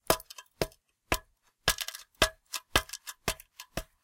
A simple recording of me walking on a small ladder.
Factory, Laddder, Metal, Walk